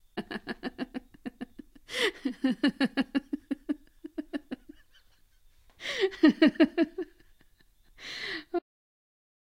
Recording a woman's laughter by telling a joke. Recorded on a Tascam dr-40 Recorder.